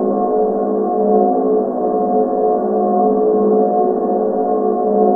Processed Sitar Tone 2
Created by recording a session of a sitar with various effects applied that greatly changed the character of the instrument.
I took several of the best bits and looped them.
creepy, dark, processed, sitar, soundesign